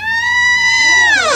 a creaking door recorded with a condenser mic. sounds normalized in ReZound.
creak, squeak